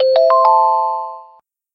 Fantasy SFX 002
Four note sequence.
ring-tone, phone, alarm, alert